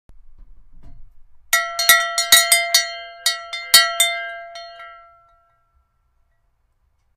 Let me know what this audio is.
Bell, ringing, ring
ring, ringing